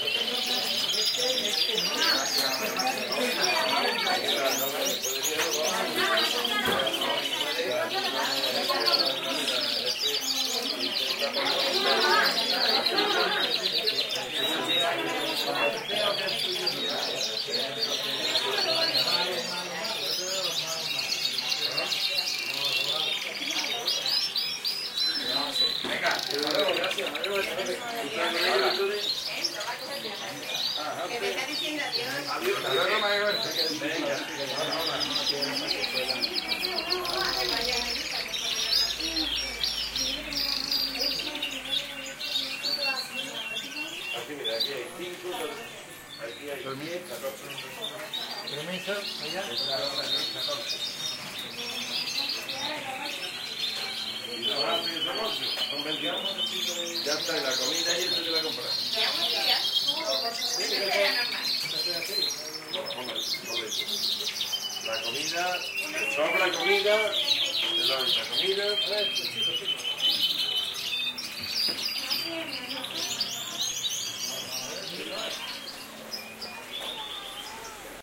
ambient of petshop, with canaries and people talk. Binaural recording done with OKM in-ear mics. Unprocessed / ambiente en tienda de animales, con gente hablando y canarios cantando